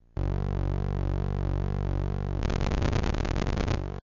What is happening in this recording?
circuit bent keyboard
circuit, bent